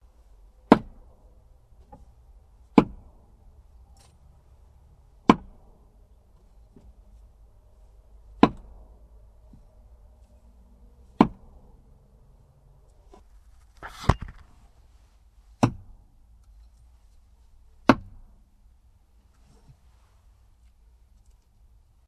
Chopping Wood 01
Chopping Wood
Recorded with digital recorder and processed with Audacity